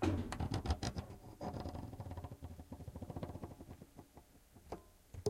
Dull scrape and bump